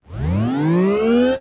An electric space engine starting sound to be used in sci-fi games, or similar futuristic sounding games. Useful for powering up a space engine, or some other complex device.